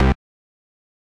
Synth Bass 006
A collection of Samples, sampled from the Nord Lead.
bass, lead, nord, synth